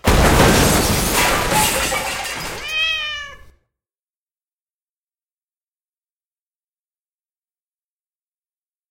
large crash with cat
Enormous comedic crash created by layering a number of sounds. Includes an angry cat. Used for a production of One Man, 2 Guvnors.
Sounds used:
crash, comic, big, huge, cat, smash, comedic, enormous